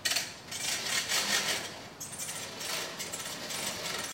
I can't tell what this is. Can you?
rubber encased grease hose being retracted with a tug of the hose. The wheel is attached to the ceiling of the mechanics garage.
up, mechanics, hose, crank, ceiling, zipping, attached, steel, wheel, a, grease, being, bay, retracted, onto, pulley, winding